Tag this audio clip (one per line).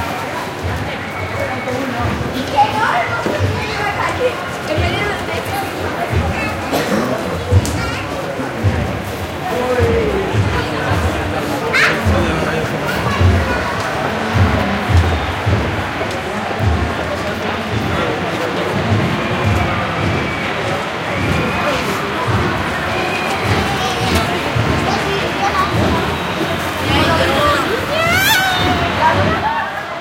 ambiance
children
city
crowd
field-recording
parade